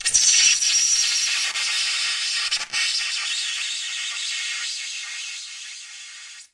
Bowed Styrofoam 5
Polystyrene foam bowed with a well-rosined violin bow. Recorded in mono with a Neumann KM 184 small-diaphragm cardioid microphone from 5-10 inches away from the point of contact between the bow and the styrofoam.